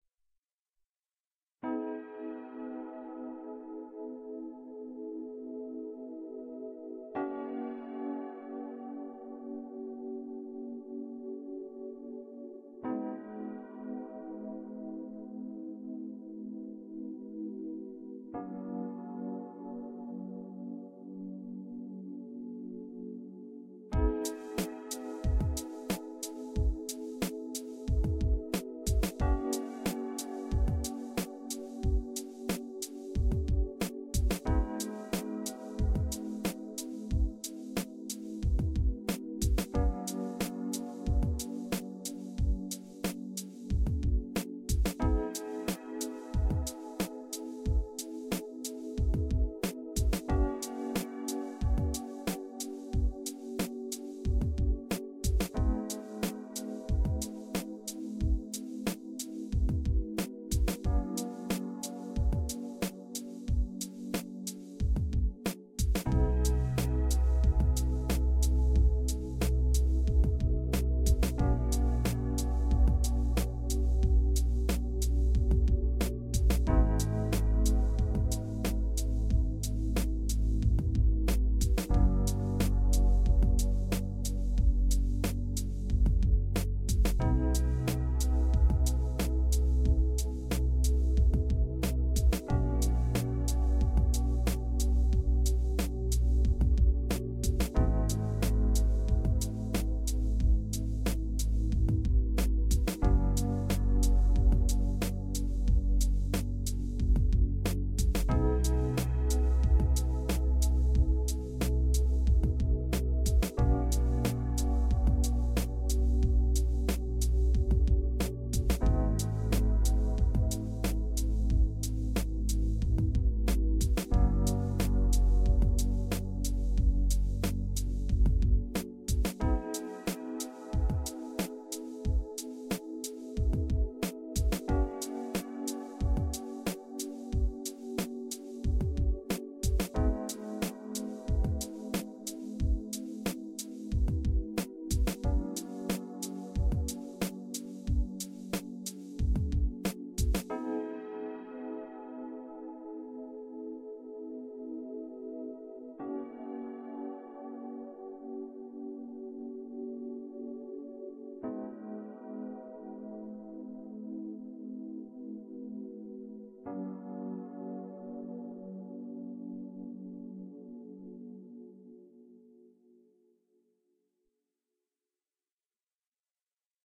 Chill background music for multiple purposes created by using a synthesizer and recorded with Magix studio. Edited with audacity.
Like it?